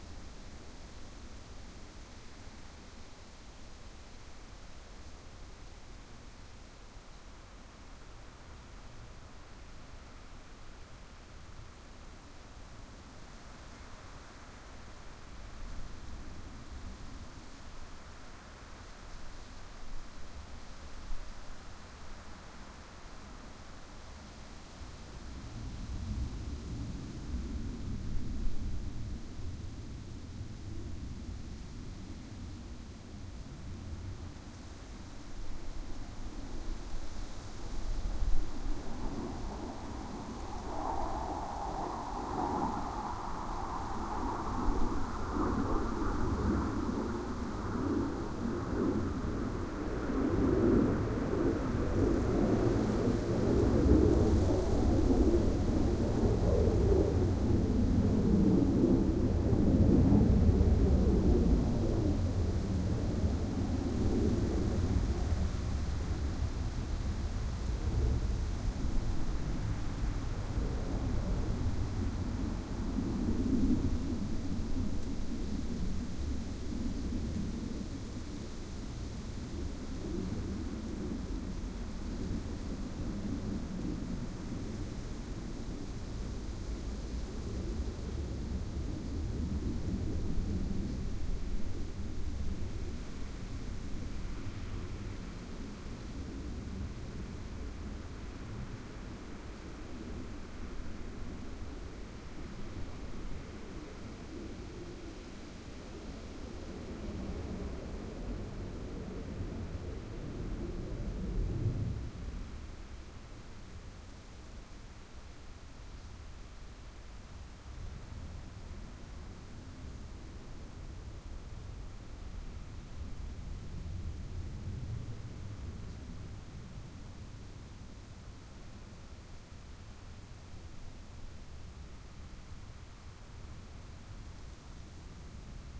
Fighter jet at night 03
File 3 / 10. F-16 Fighting Falcon flying low after midnight near Varde, in Denmark. F-16 pilots are practising dogfight and night flying all night through. This was cut out of a two hour long recording, there's a lot of wind at some points, but one definitely can hear the jets clearly. This lets you hear how it sounds when an F-16 passes by almost exactly over you. There's a good doppler effect and a nice depth to this recording.
Recorded with a TSM PR1 portable digital recorder, with external stereo microphones. Edited in Audacity 1.3.5-beta on ubuntu 8.04.2 linux.
aero, aeroplane, denmark, fighter, fighter-jet, flying, jet, midnight, military, windy